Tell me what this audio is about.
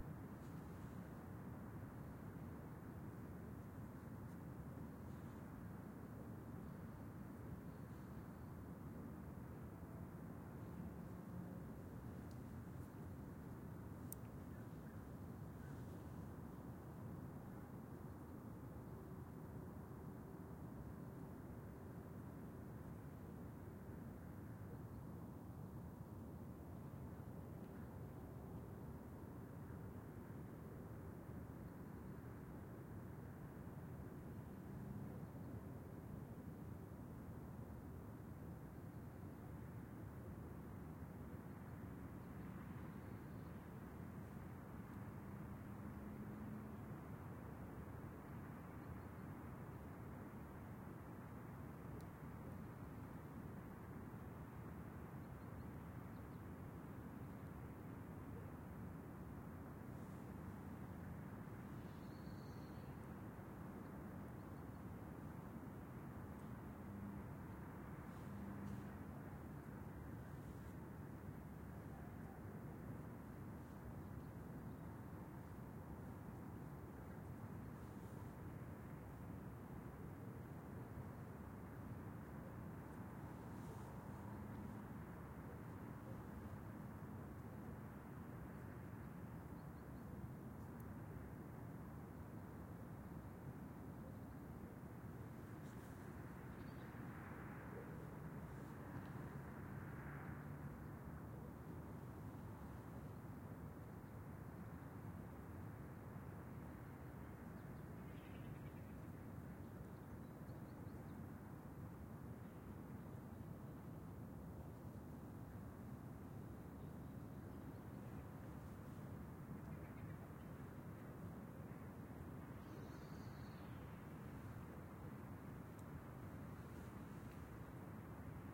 Country side winter evening ambiance. Freezing cold at -3°C. Far away bird calls. At an RMS of almost -50dB a very quiet environment. MS recording using a Sennheiser MKH60/30 mid-side pair on a Sound Devices 702 recorder. Decoded to L/R stereo at the recorder stage.
outdoor, winter, nature, field-recording, weather, birdsong, birds, atmosphere